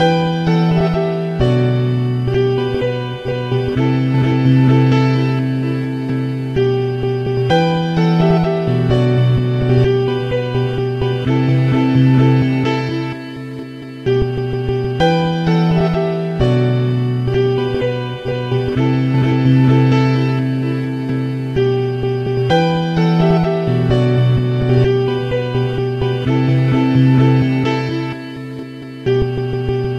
Smooth Piano Loop
Downtempo, Loop, Piano